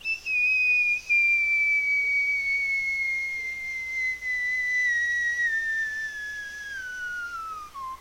Basically, I just whistled into my headset microphone, pitch descending, until I was done.